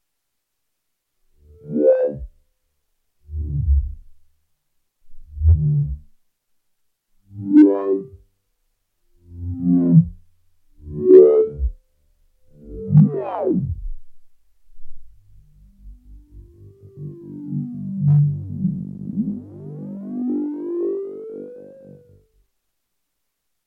Slow distorted wah effect based on clock divider modules from a Clavia Nord Modular synth.